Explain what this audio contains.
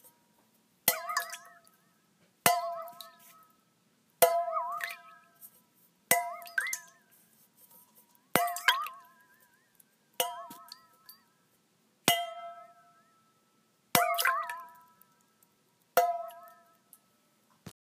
Strange noise from metal water bottle with a little bit of water at the bottom.